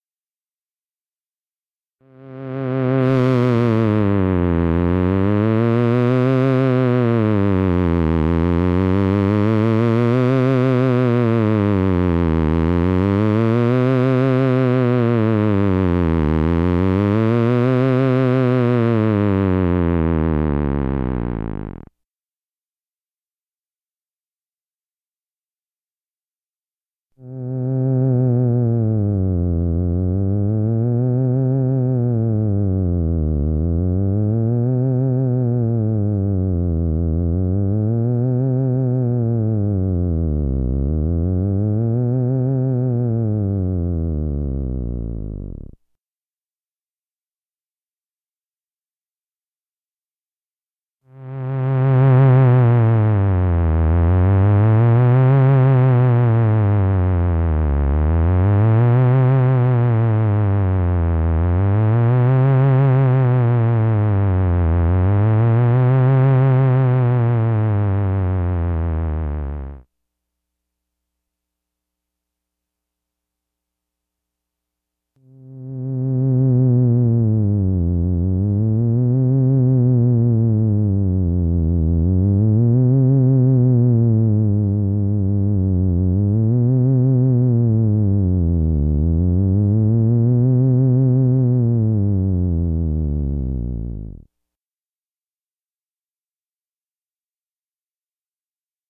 HypnoTones Low B
File contains a second collection of 4 or 5 creepy, clichéd "hypno-tones" in the theremin's lowest ranges, each separated with 5 seconds of silence. Each hypnotone in the file uses a different waveform/tonal setting to give you various textural choices.
As always, these sounds are recorded "dry" so that you can tweak and tweeze, add effects, overdub and mangle them any way you like.
hypnotic, authentic-theremin, sci-fi